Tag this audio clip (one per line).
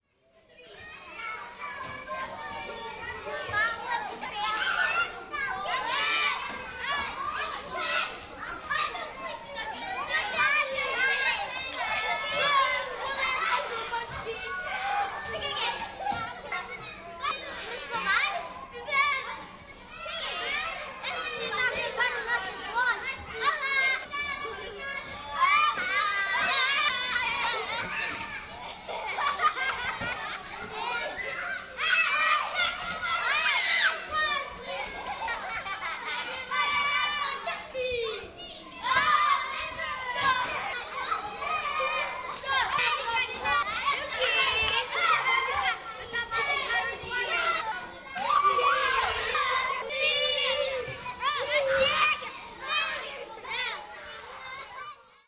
children
playing
school-yard
screaming
yelling